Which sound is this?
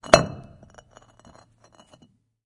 stone on stone impact loud3
stone falls / beaten on stone
stone, impact, strike